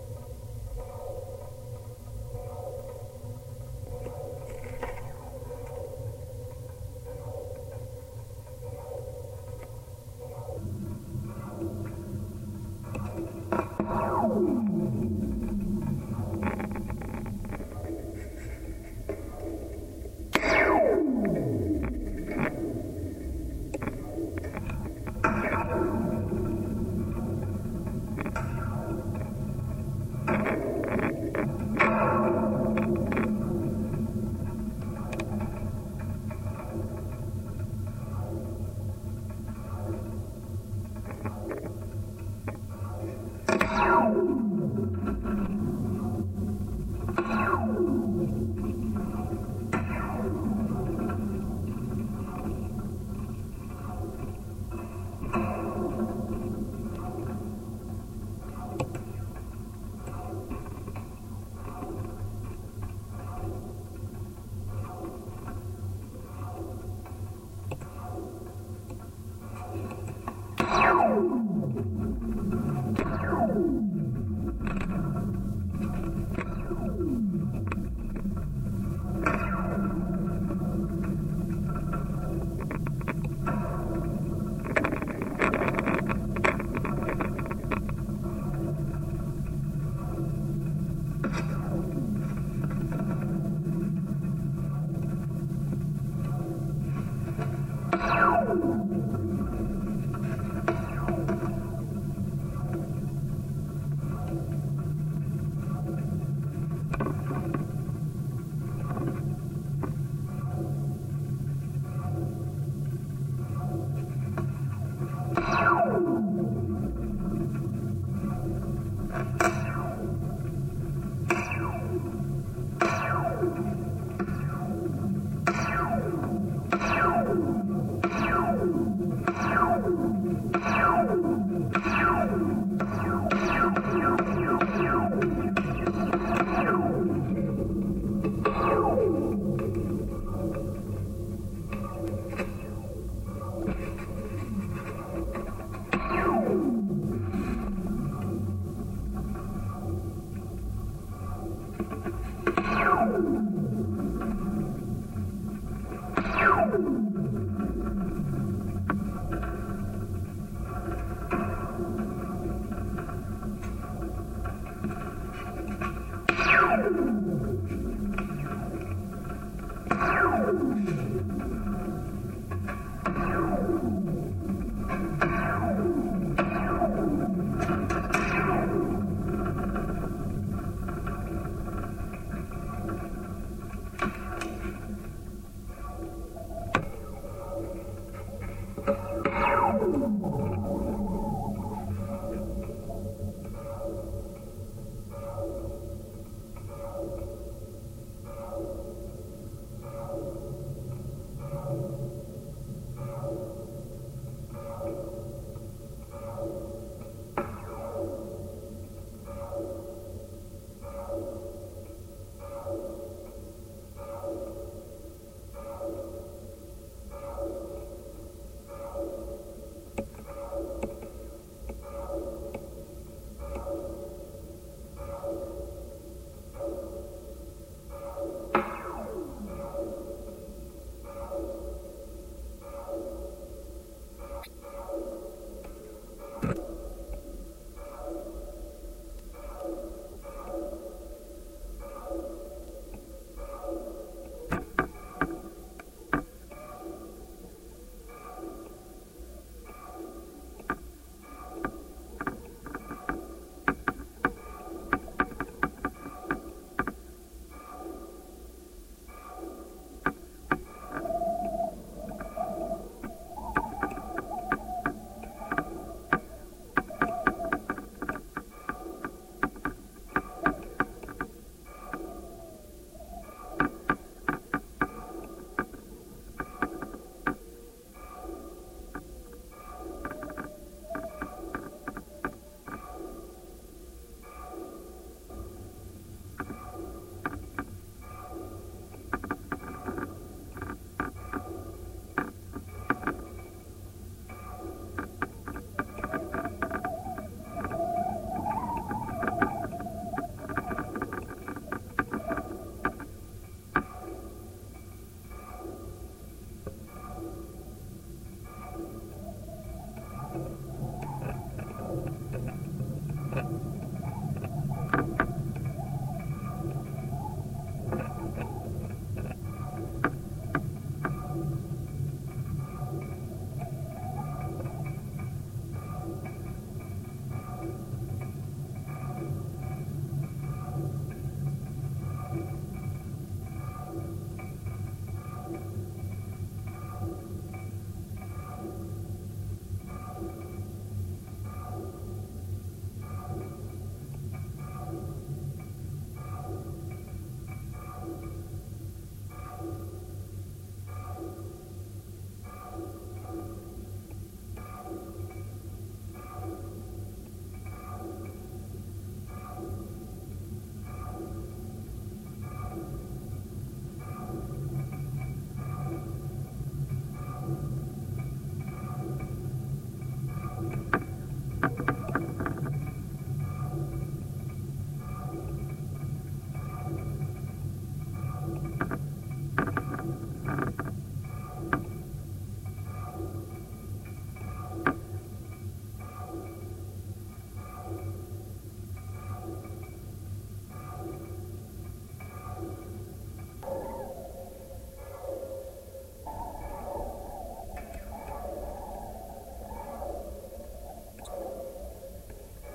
tower-guy-01 2007-09-15
Part one - Piezoelectric contact transducer secured to a stranded cable guy wire supporting a radio tower. The guy wire is probably 100-150 feet long, tower is about 250 feet high. You will hear sounds traveling through the long steel elements of the wire and also the tower as well. There a strobe light on the very top of this tower. When it flashes, it makes small, mechanical noise that travels through the entire tower and all the guy wires. You can hear this as a 'pinging' sound. I also plucked the guy wire like a guitar string. This is how they made the famous sound effect in Star Wars. Since Steel is a dispersive medium, the sounds start high in frequency and then fall to low frequency. Piezo element directly connected to line input (Not mic input) of a Marantz PMD660
guy-wire, piezoelectric, tower